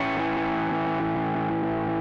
Randomly played, spliced and quantized guitar track.
buzz, distortion, guitar, overdrive